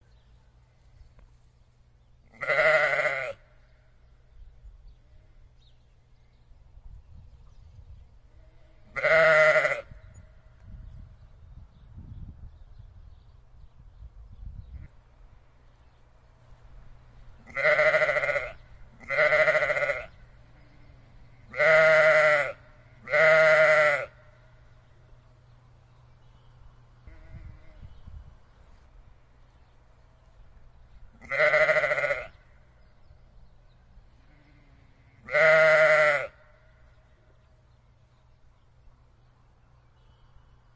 sheep sound
Sound of the sheep.